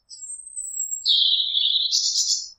Bird Noise - 4
rural, song, chirping, birds, woods, twitter